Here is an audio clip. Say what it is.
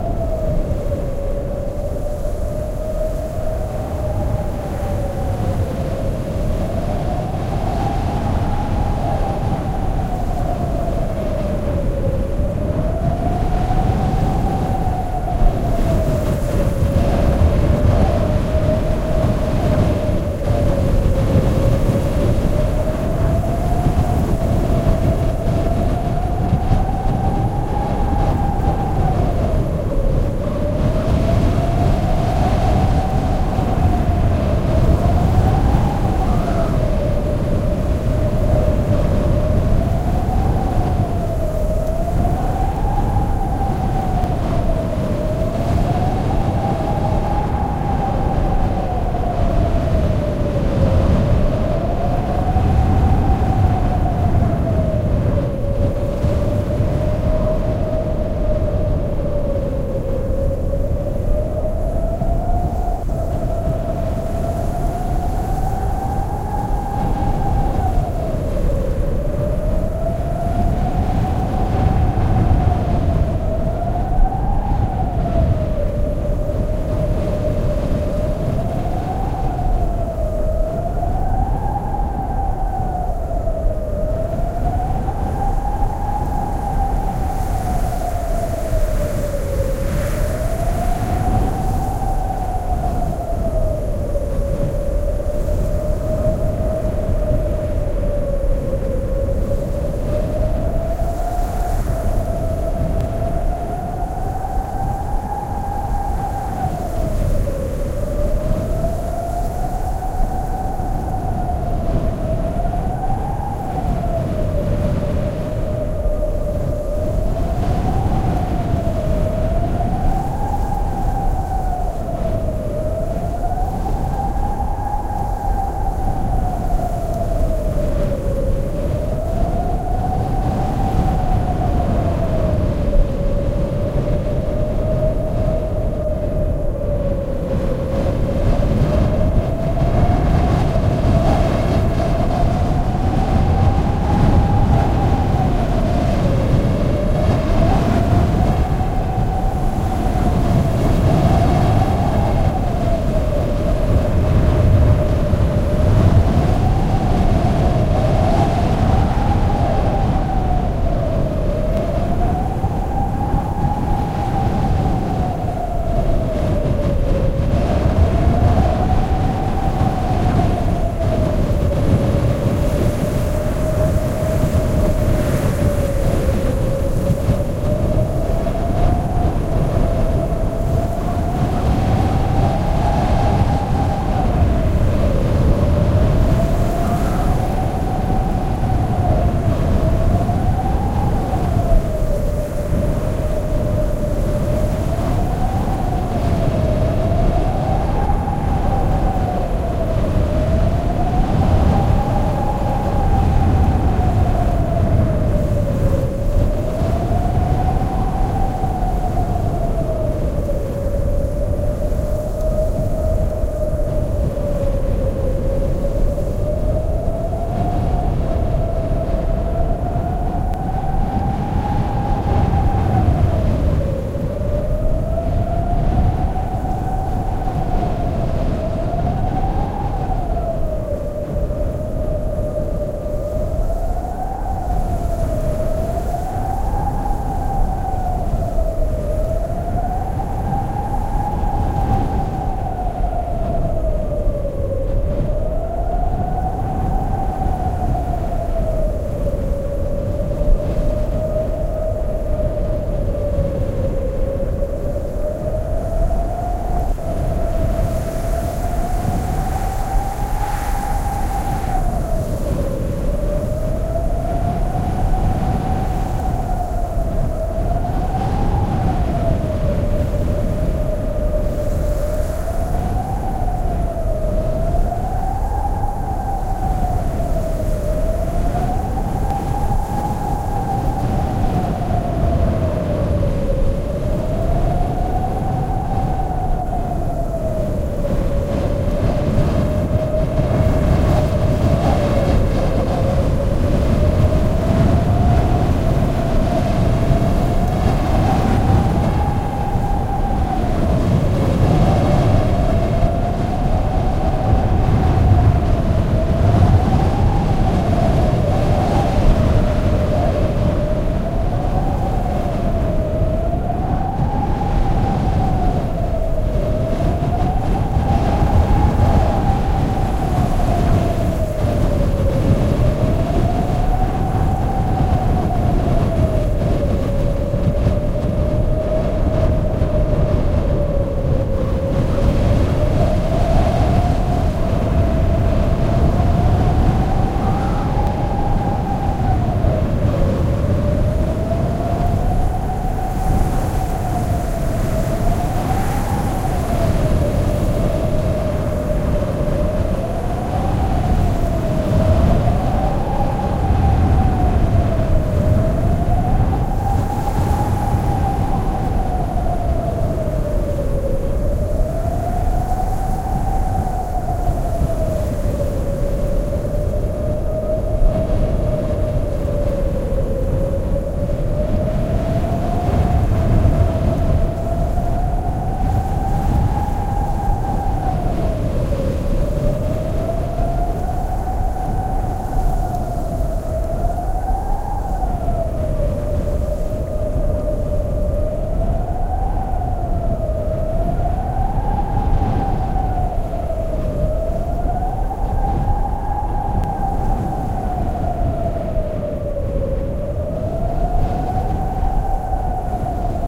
Sandstorm Looping
sandstorm, wind